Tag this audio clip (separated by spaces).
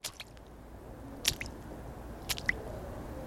Lyon Univ